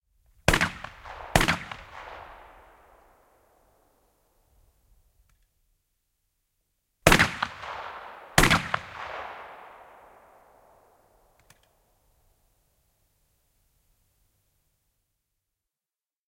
Haulikko, kaikuvia kaksoislaukauksia ulkona / A shotgun, echoing double shots, exterior
Ampumista ulkona, kaikuvia laukauksia vähän kauempana.
Paikka/Place: Suomi / Finland / Vihti, Leppärlä
Aika/Date: 14.10.1984